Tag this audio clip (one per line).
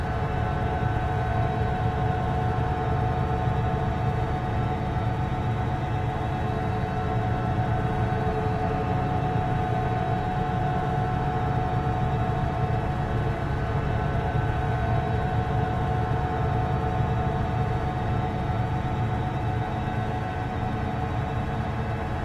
metallic
sinister